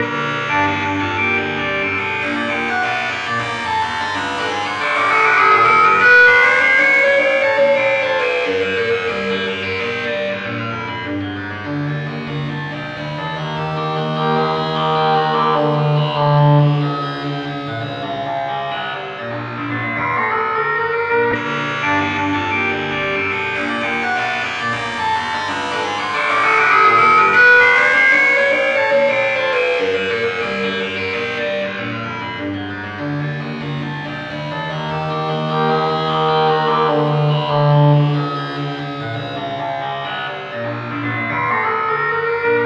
Resonated Moan
Female moan, tuned down, timestretched and heavily treated with the resonator of Ableton Live. No instrument played. The melodic structure is a result of resonated crackling and hissing.
female, melodical, moan, resonator, timestretched